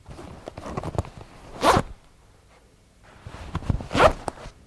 Flys on jeans being unzipped, then zipped back up.